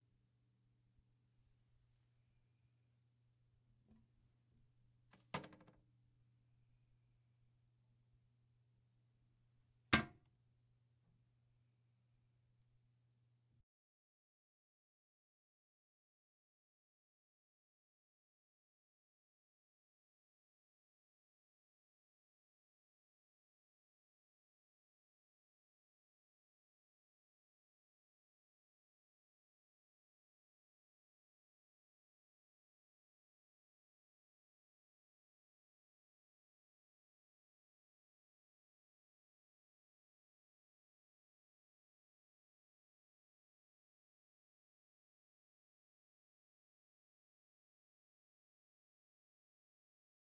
untitled toilet seat
seat, field-recording, toilet